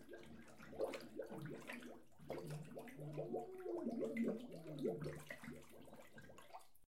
Person gargling underwater